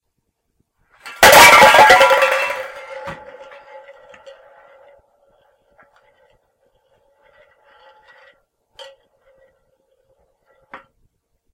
The sound of cans crashing. Suitable for those 'sneak quietly though enemy territory' scenes ;)